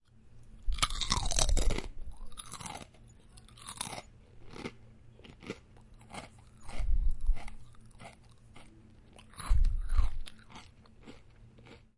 Eating Chips
Park, Point, Field-Recording, University, Elaine, Koontz